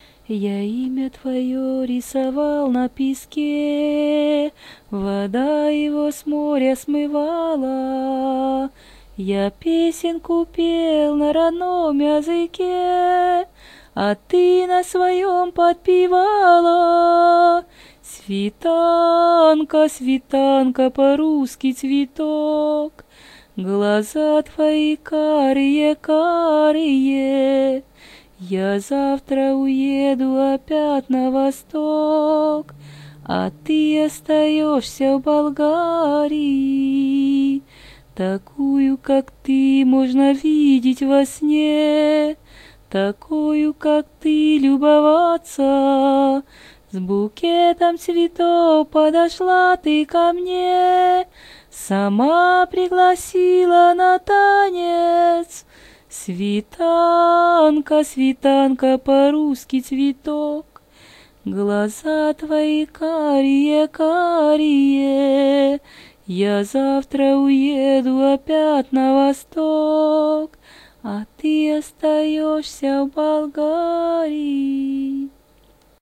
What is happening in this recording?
Mama`s songs